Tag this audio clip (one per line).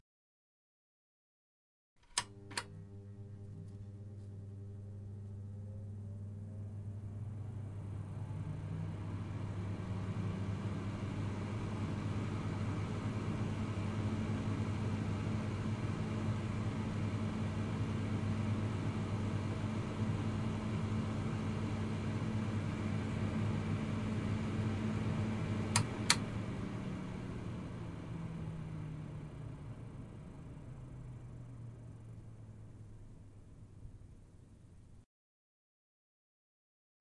CZ
fan
rotation